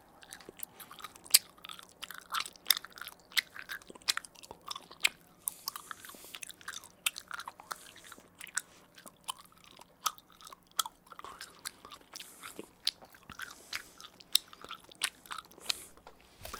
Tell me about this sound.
chewing gum
chewing on gum